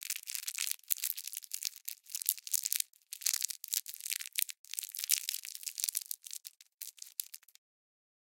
candy wrapper crinkle big A
a lot of crinkling a plastic candy wrapper with fingers.